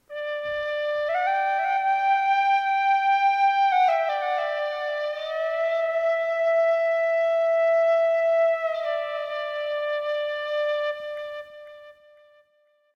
Short Irish Whistle Sound